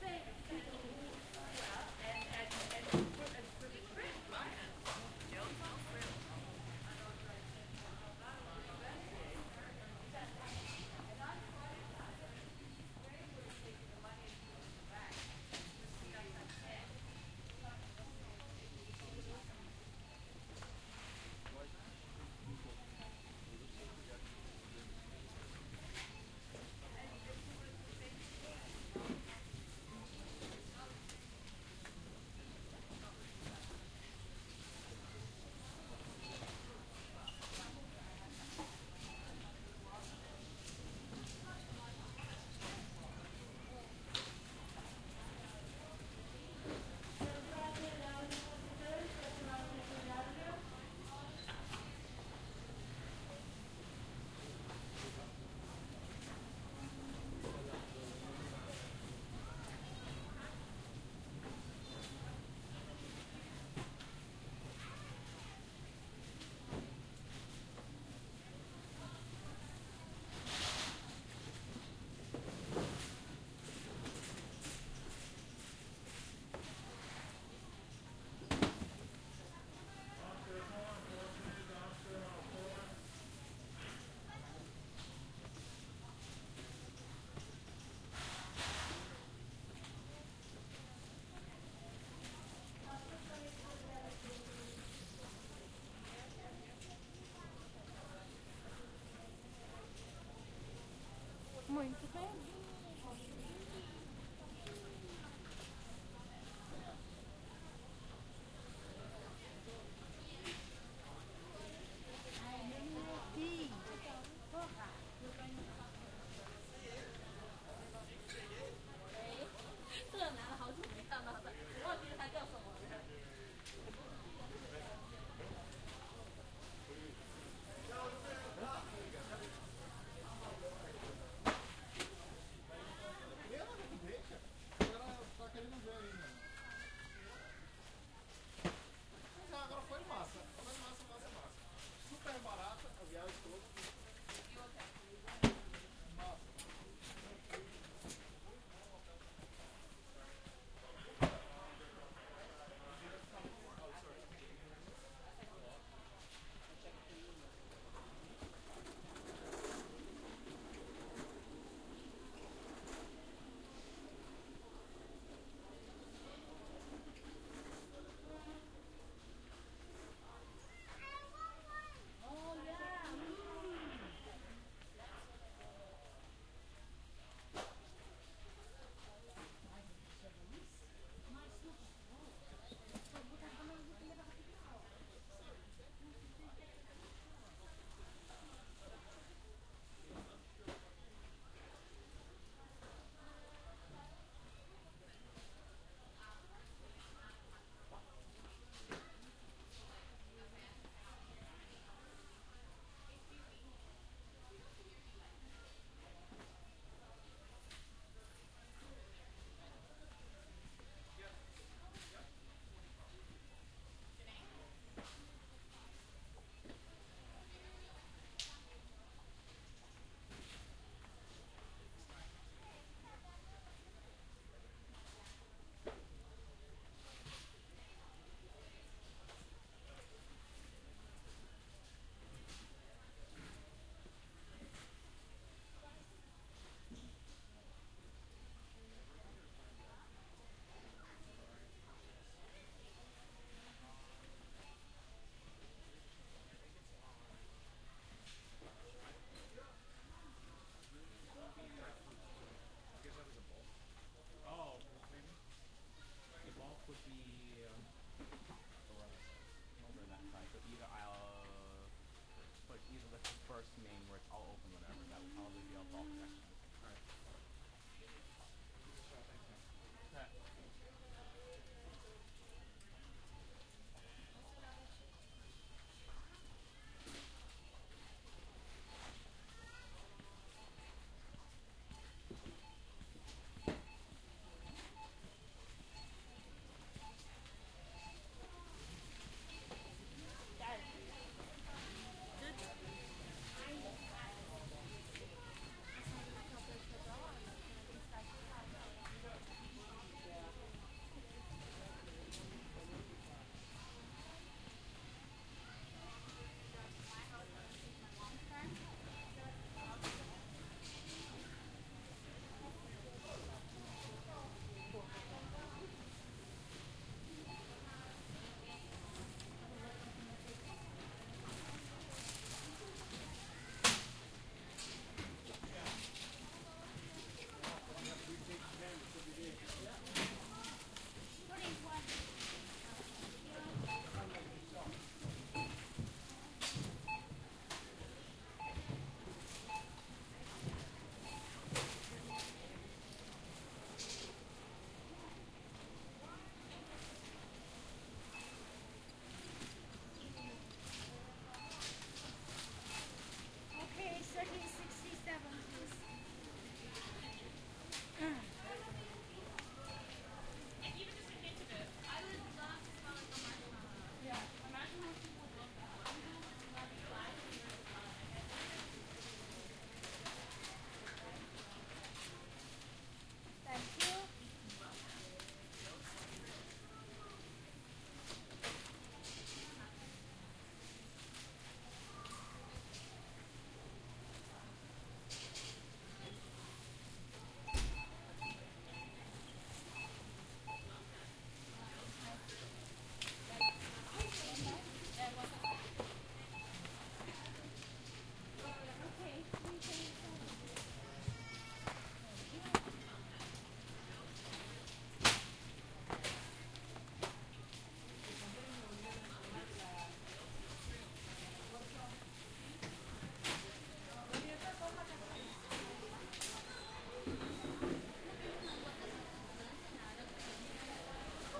Stereo binaural recording, wandering around a large grocery store for awhile.
ambient, background, binaural, grocery